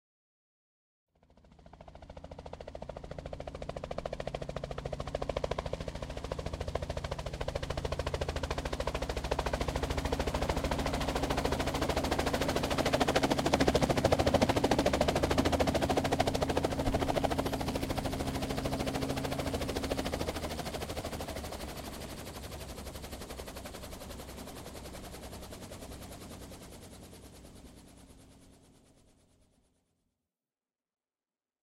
A brief sound clip of a helicopter landing on the Wellington waterfront.